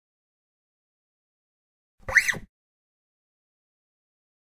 1-2 Laser gun
Czech, Panska, CZ